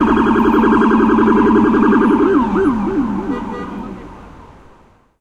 WaHi Siren Blast short
Ambulance chirping siren